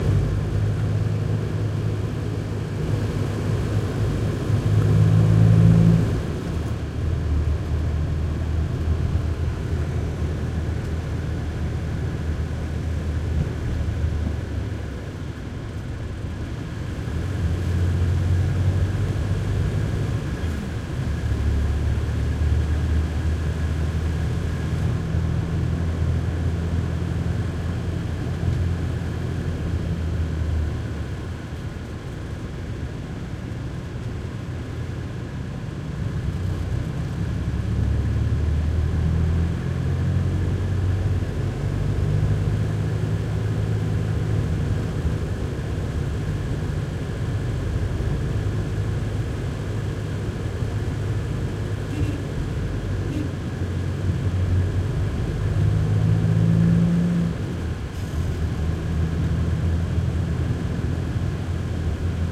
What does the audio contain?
Thailand truck minibus int throaty driving real bumpy2 +air conditioning
Thailand truck minibus int throaty driving real bumpy +air conditioning
Thailand, driving, field-recording, int, minibus, truck